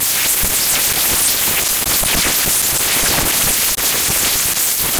Results from running randomly-generated neural networks (all weights in neuron connections start random and then slowly drift when generating). The reason could be input compression needed for network to actually work. Each sound channel is an output from two separate neurons in the network. Each sample in this pack is generated by a separate network, as they wasn’t saved anywhere after they produce a thing. Global parameters (output compression, neuron count, drift rate etc.) aren’t the same from sample to sample, too.
harsh, random